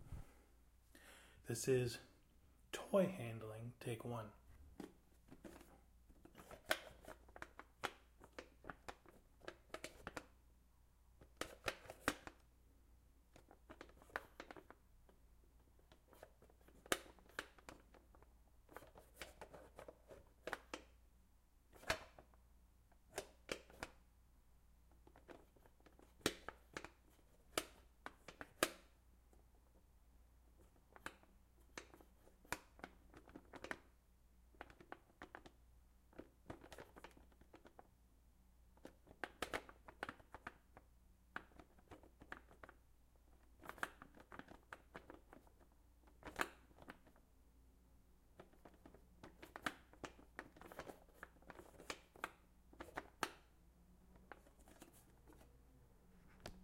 FOLEY toy handling 1
What It Is:
Me handling a metal car, Speed Racer's Mach 5.
A young girl handling a toy horse.
AudioDramaHub, birthday, foley, toy